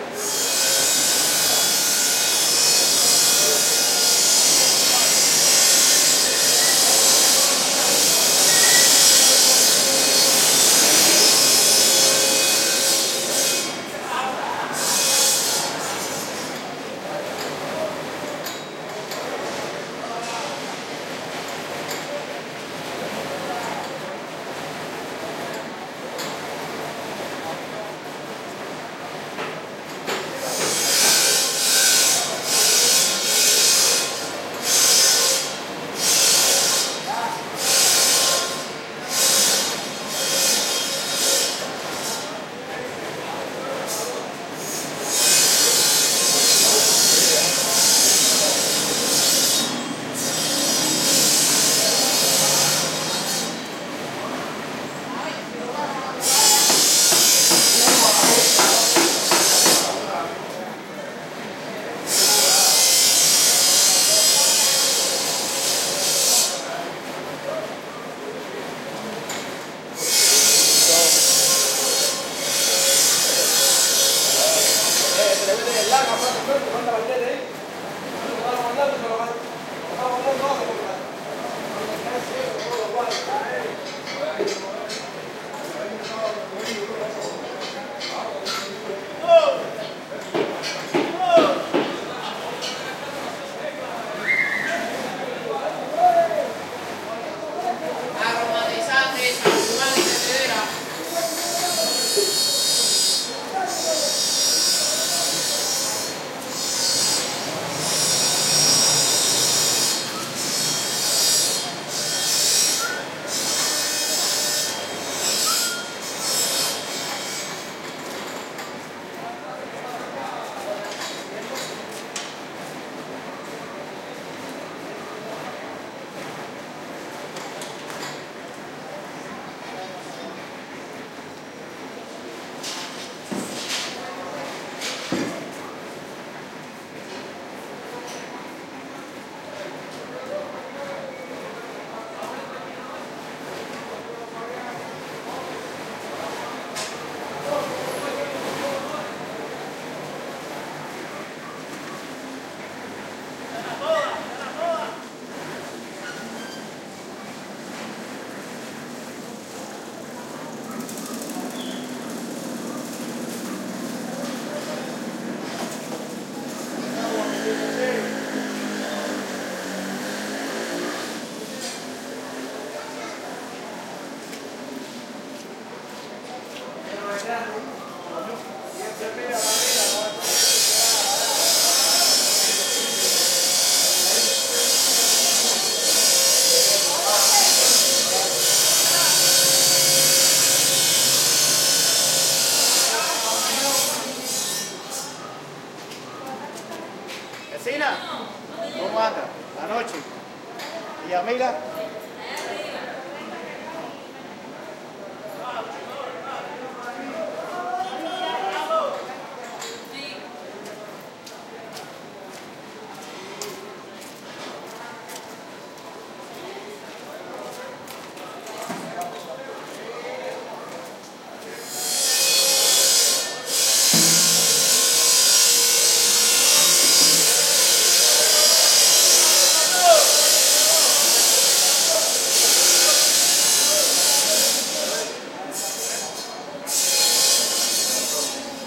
workers cuban alley +bandsaw
Group of cuban men working in an alley-like street in old Havana, recorded from 100m away. bandsaw heard frequently, resonating off buildings.
alley, bandsaw, construction, crowd, cuba, echo, walla, workers